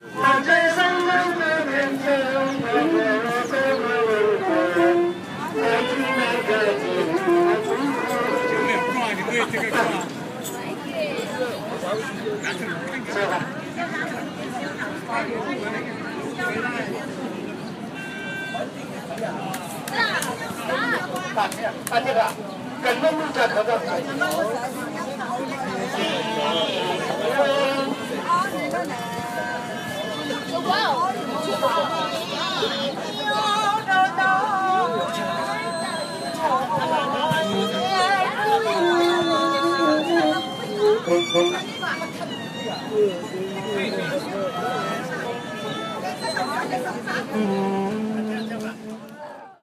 Chinatown Band Tune Up
Pre funeral march the band prepares to play through the march.